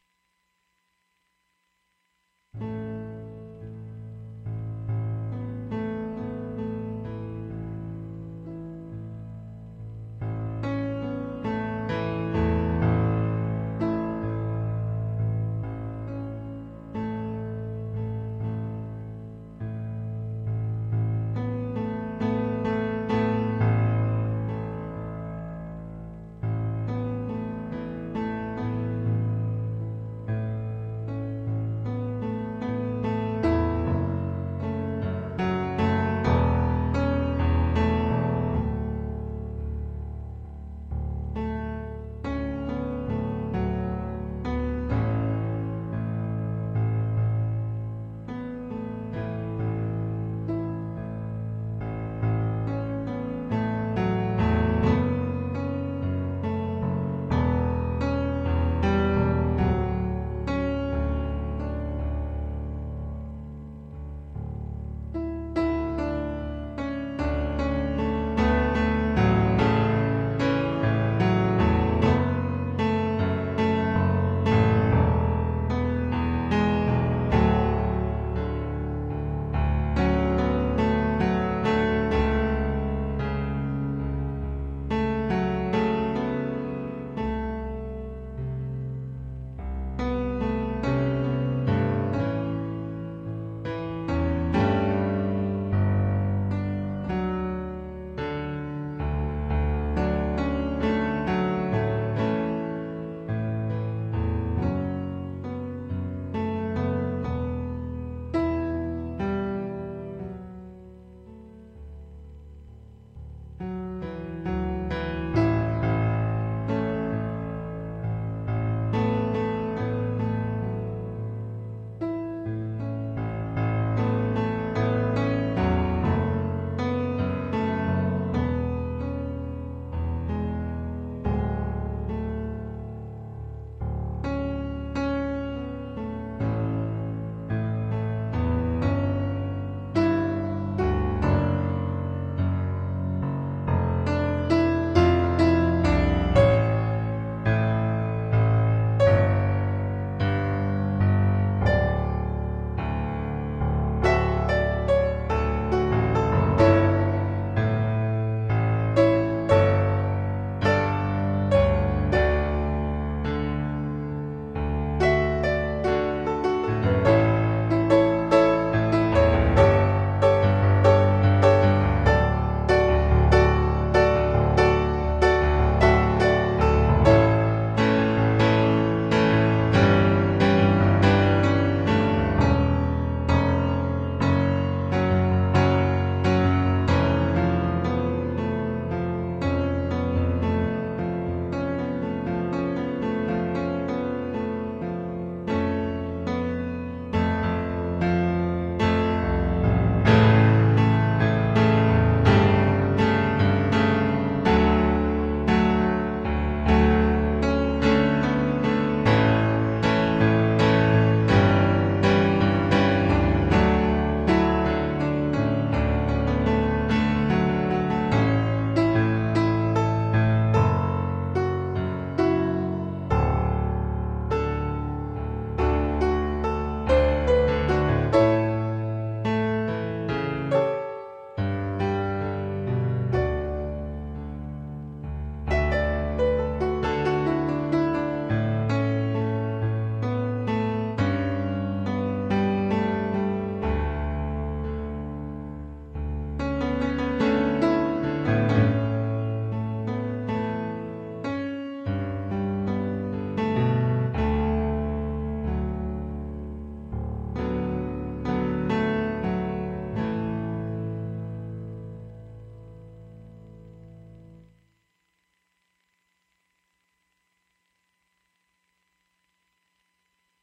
piano improv 1 1 2010
Recorded using an Alesis QS8 keyboard using a direct signal.
This sound file is unedited so you will most likely hear mistakes or musical nonsense. This sound file is not a performance but rather a practice session that have been recorded for later listening and reference.
Thank you for listening.